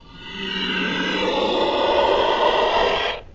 Ecoed Roar
A "dark" roar.
Made with Audacity, editing my own voice.